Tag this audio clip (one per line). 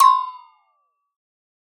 Percussion
Abstract
Short
Agogo
Oneshot